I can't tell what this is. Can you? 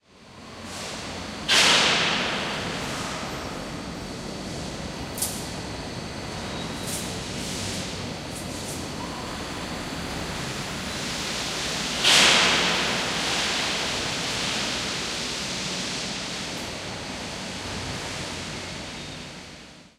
Unprocessed stereo recording in a steel factory.